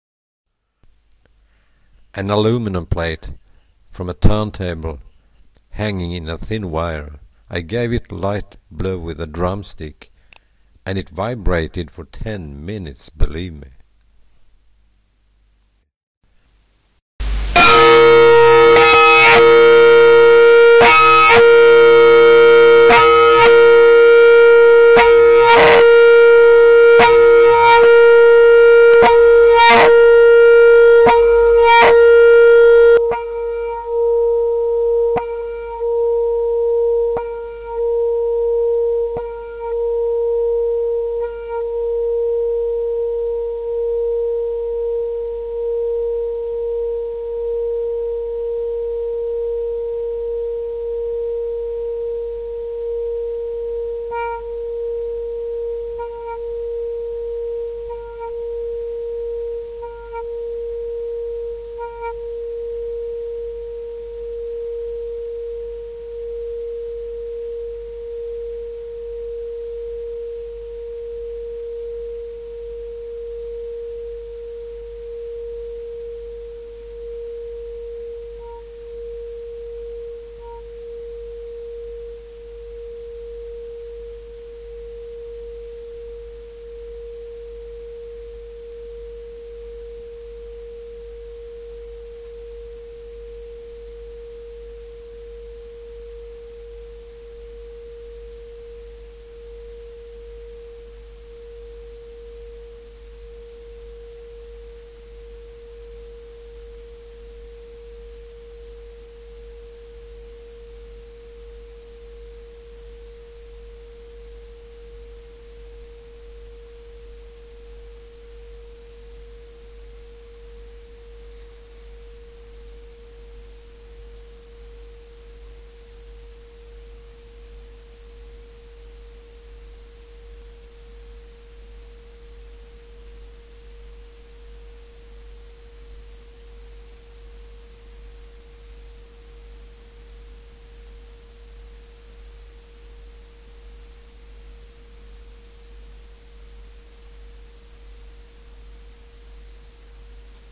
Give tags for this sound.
vibration
experiment
resonance

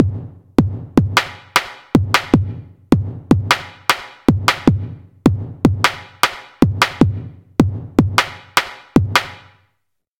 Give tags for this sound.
3
34
beat
drums
loop
music
percussion
quarter
time

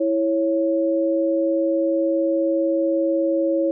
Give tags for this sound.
dial
electronic
processed
sound
supercollider
telephone

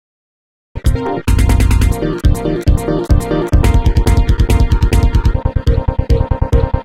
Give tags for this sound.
ambient
background
d
dark
dee-m
drastic
ey
glitch
harsh
idm
m
noise
pressy
processed
soundscape
virtual

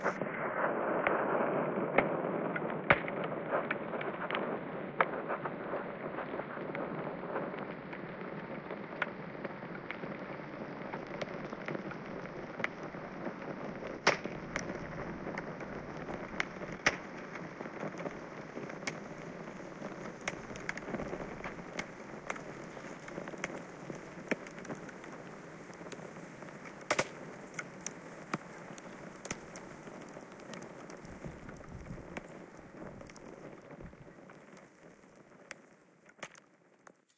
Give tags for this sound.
fire
element
competition

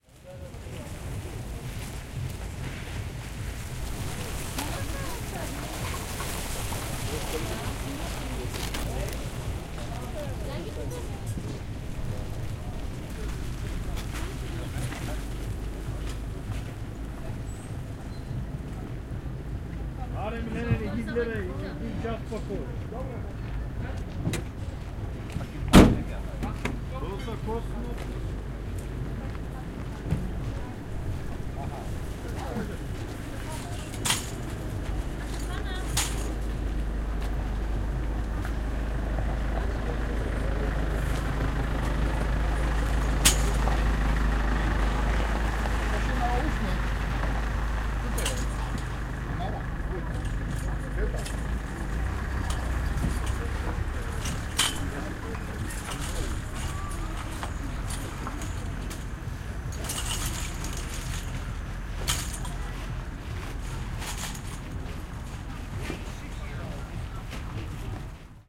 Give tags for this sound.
rustle
marketsquare

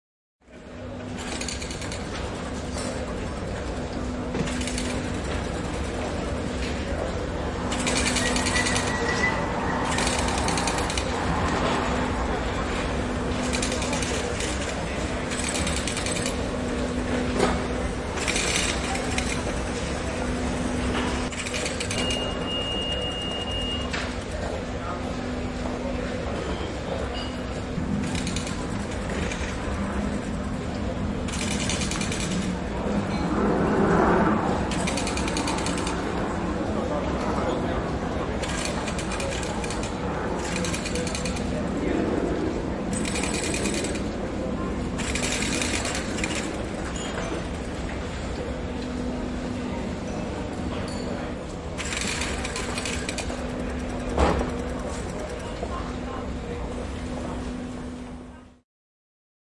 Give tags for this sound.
Air-travel
Aviation
Field-Recording
Finland
Finnish-Broadcasting-Company
Ilmailu
Soundfx
Suomi
Tehosteet
Yle
Yleisradio

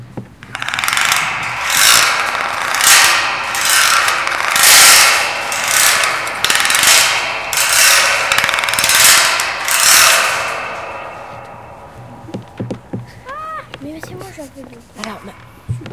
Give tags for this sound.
France
Paris
recordings
school

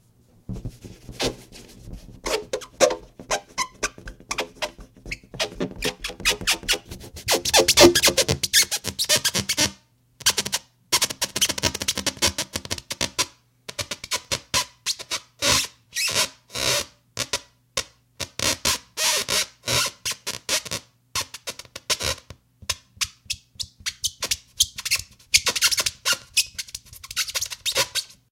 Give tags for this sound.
rub
friction
ballon

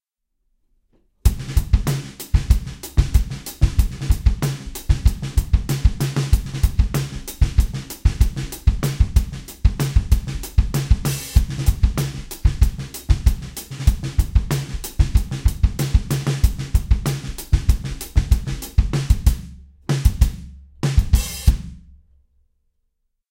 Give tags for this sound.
Compressed
Snickerdoodle
mLoops
Loop
150
Hip
BPM
Acoustic
Hop
Drum
EQ
Beats
Electronic

